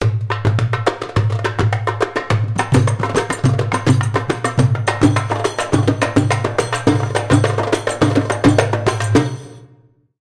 diffrent type of Percussion instrument of darbouka :
ayyoub/darij/fellahi/malfuf/masmudi-kibir/masmudi-sagir/rumba-.../Churchuna/Dabkkah/Daza/
130-bpm, percussion-loop, rubbish, loops